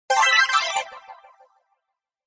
explosion beep kick game gamesound click levelUp adventure bleep sfx application startup clicks event
adventure, application, beep, bleep, click, clicks, event, explosion, game, gamesound, kick, levelUp, sfx, startup